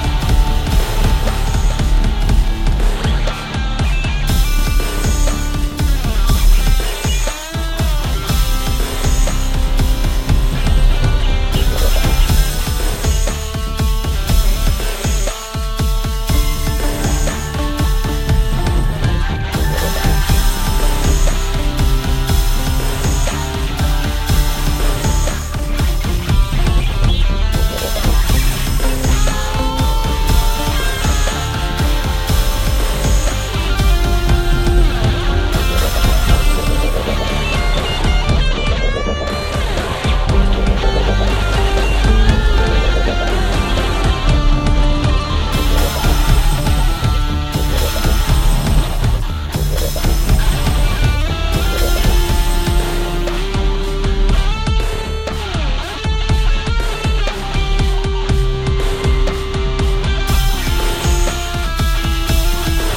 King of the Concrete Jungle Loop
A quick little diddy I threw together to test my new drum kit.